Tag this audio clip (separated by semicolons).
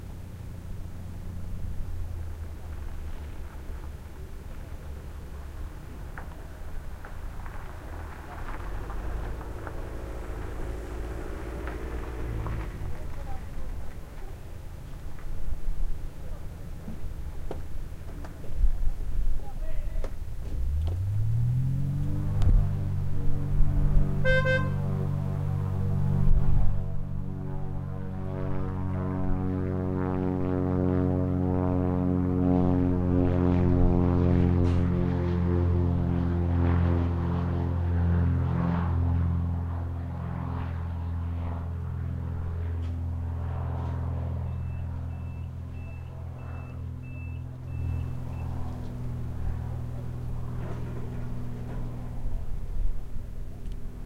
airplanes; car; pick-up; planes; traffic